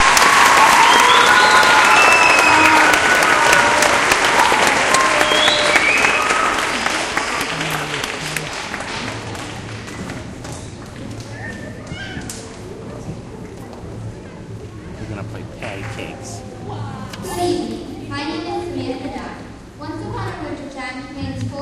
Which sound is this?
raw recital applauseloudy
Raw unedited sounds of the crowd in a auditorium during a Christmas recital recorded with DS-40. You can edit them and clean them up as needed.
auditorium, audience, applause, crowd